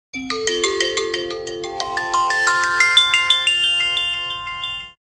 A simple alarm clock that sounds like one of your phone